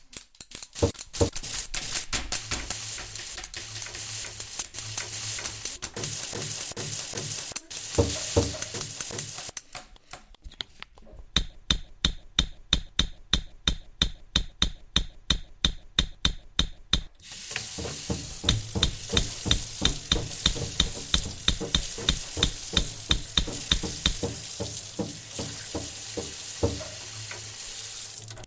Here soundscapes created by students of La Poterie school.
france, lapoterie, soundscape, rennes